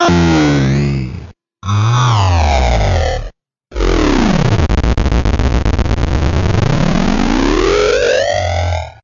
learning machine some sounds
bent, circuit